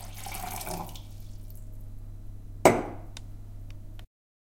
Pouring water from the tap into glass.
fill, water